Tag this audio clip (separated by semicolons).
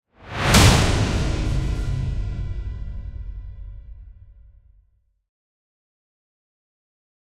bass; boom; cinematic; deep; effect; epic; explosion; game; gameplay; hit; impact; implosion; indent; industrial; logo; metal; movement; reveal; riser; sound; stinger; sub; sweep; tension; thud; trailer; transition; video; whoosh